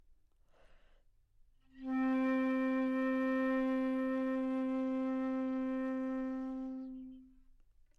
overall quality of single note - flute - C4
Part of the Good-sounds dataset of monophonic instrumental sounds.
instrument::flute
note::C
octave::4
midi note::48
good-sounds-id::81
good-sounds, flute, C4, multisample, single-note, neumann-U87